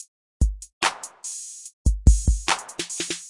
On Rd loop 11
8 bar drum pattern made in FL studio 10s FPC plugin. I do not know yet or it is possible to mix loops made in FPC so these sounds are as they came in FL Studio 10
145-bpm, 8-Bar, On-Rd, On-road, drum, hip-hop, sample